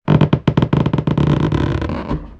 bln rub lng 01
Rubbing a balloon with the hands.
rub, balloon